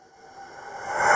a sudden stop, i don't know how to better describe this sound.
monster, scary, stop, sudden